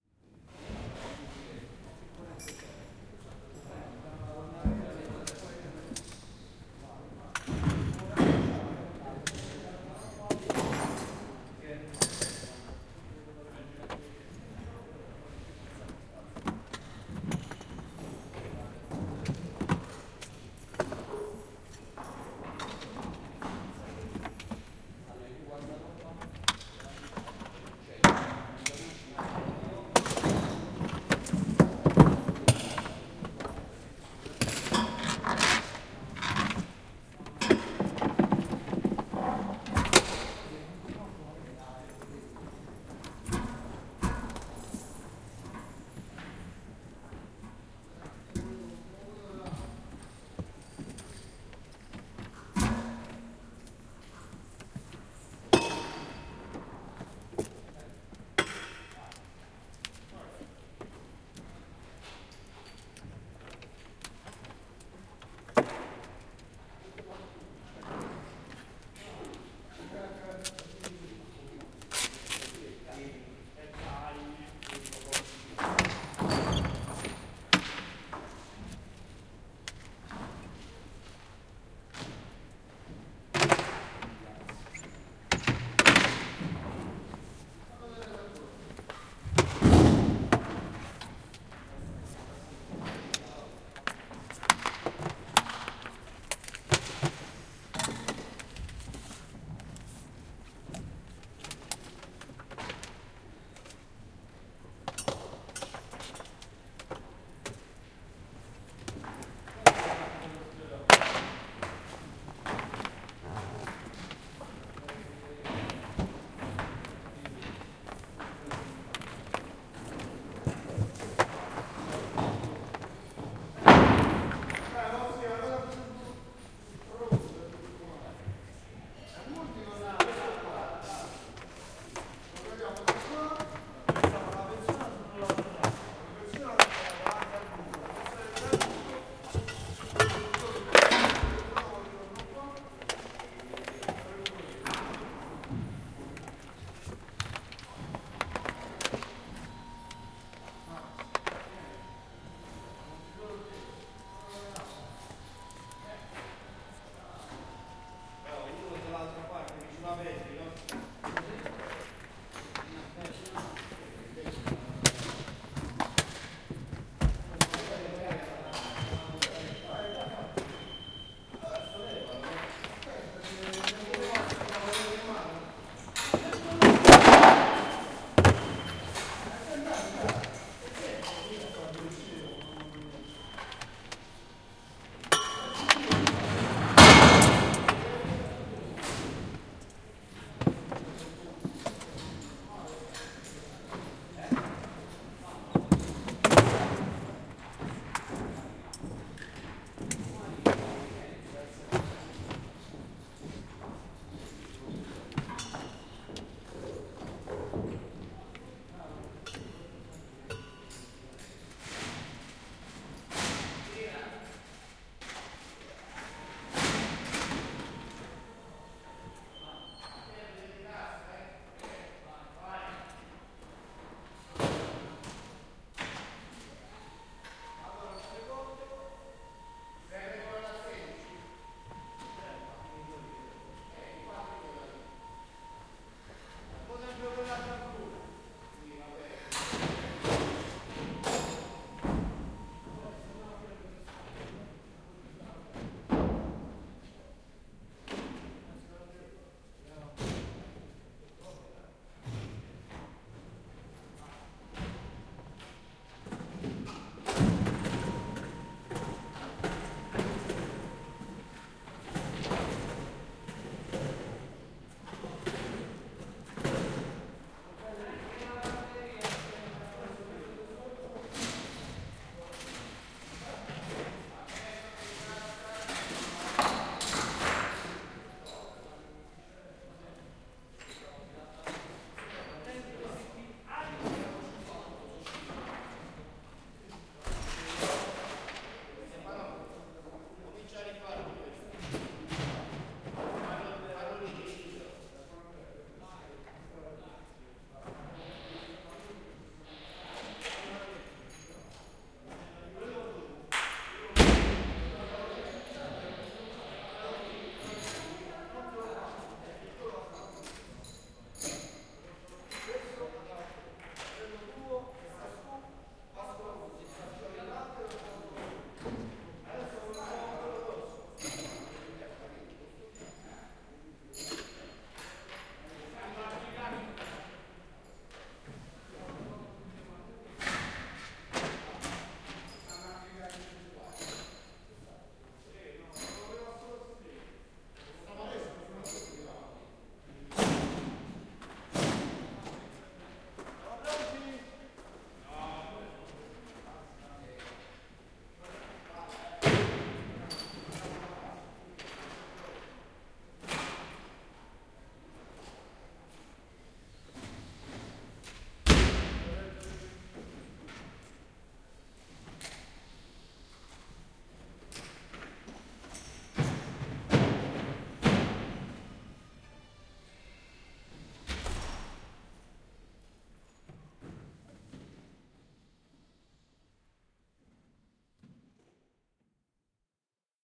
People and machinery working - Rome
Recording made during the dismantling of the facilities and stage sets assembled in the Auditorium Conciliazione, immediately after an event just finished; sounds produced by people working, voices of the workers, drones of machinery, every sound is colored by the special acoustics and reverberation of this concert hall.
Recorded with a Zoom H4n.
Registrazione effettuata durante il disallestimento degli impianti e delle scenografie montate nella sala dell'Auditorium di via della Conciliazione, subito dopo un evento appena concluso; i suoni dei lavori, le voci degli operai, i ronzii delle macchine, ogni suono è colorato dall'acustica particolare e dal riverbero di questa sala da concerti.
Registrato con uno Zoom H4n.
concert-hall, constructing, construction, drilling, field-recording, reverb, work, workers